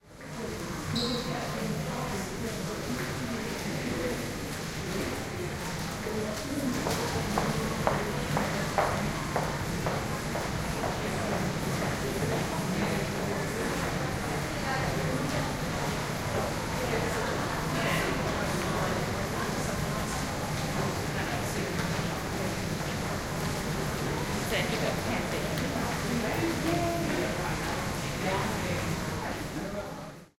Mall Ambiance High heels
High heels can be heard in this recording from a amall shopping mall (Regent Arcade Adelaide).
people, walking, foot-steps, ambiance, crowd, field-recording, binaural, shopping-mall, voices